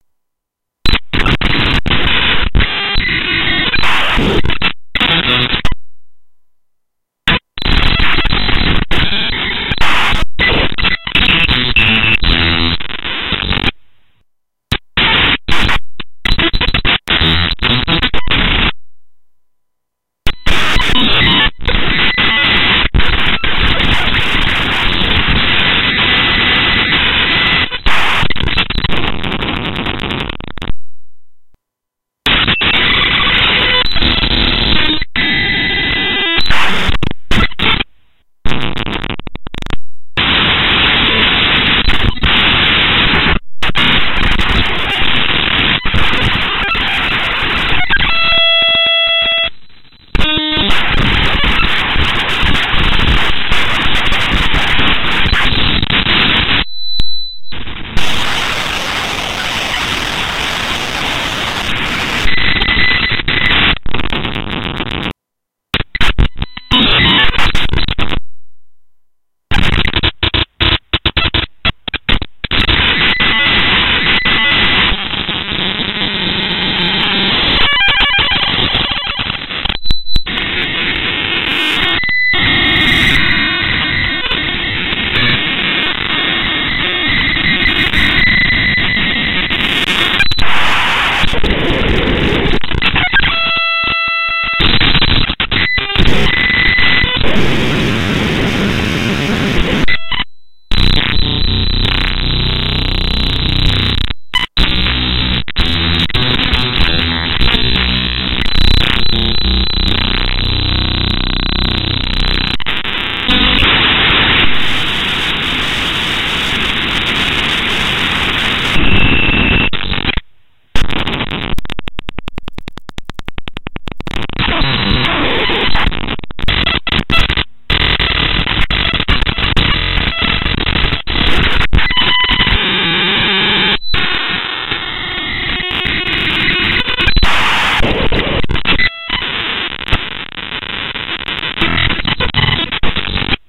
Broken Radar
November 9 2007 Recording Some Guy Recorded In Copter It’s Located In South China Sea! This Recording Is Made On November 9 2007
Radar, Sea, Corrupt, Nuke